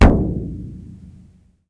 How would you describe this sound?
Mono samples of a small children's drum set recorded with 3 different "sticks". One is plastic with a blue rubber tip that came with a drum machine. One is a heavy green plastic stick from a previous toy drum. The third stick used is a thinner brown plastic one.
Drum consists of a bass drum (recorded using the kick pedal and the other 3 sticks), 2 different sized "tom" drums, and a cheesy cymbal that uses rattling rivets for an interesting effect.
Recorded with Olympus digital unit, inside and outside of each drum with various but minimal EQ and volume processing to make them usable. File names indicate the drum and stick used in each sample.
bassdrum, drum, drums, hit, kick, kick-drum, kit, mini, one-shot, percussion